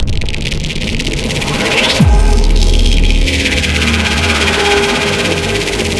massive distorted impact
An impact sound made using NI Massive, with additional processing.
noise, distorted, impact